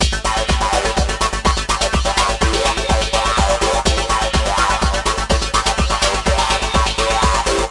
TR LOOP - 0517
the original pitch is two levels up 140-tempo.this version is paste modulation (chorus\flanger)
goa, goa-trance, psytrance, goatrance, psy-trance, psy, loop